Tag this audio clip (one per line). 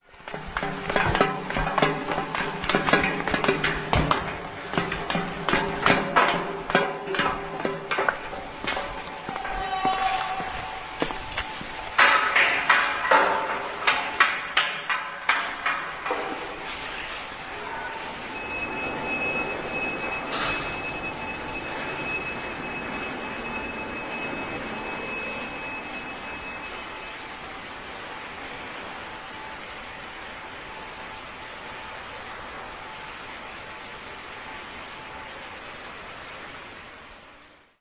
ambience
hall
poland
steps
stone-connection